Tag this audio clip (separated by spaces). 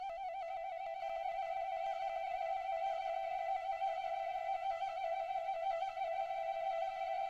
exotic warble call birds